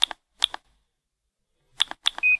SiemensM55-key-click
Physical sound of clicking cellphone keys. Physical popping sound, finally one electronic beep. A little hissing.
Siemens M55 mobile phone recorded with a RØDE Videomic from close range. Processed slightly for lower noise.
beep, cellphone, click, key